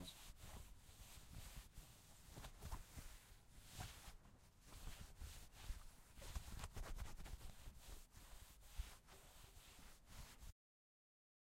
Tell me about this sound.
Material Rubbing: Rubbing on material, hand on material, friction. Subtle, soft sound. Recorded with Zoom H4n recorder on an afternoon in Centurion South Africa, and was recorded as part of a Sound Design project for College. A thick material was used to create this sound.